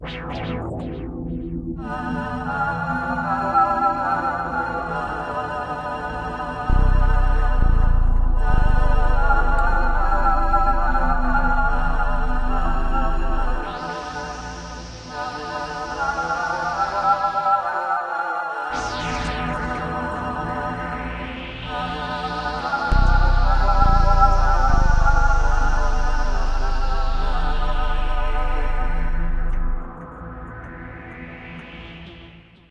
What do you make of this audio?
a synth soundtrack for the cryochamber area of the game Myelin Alpha
more or less loopable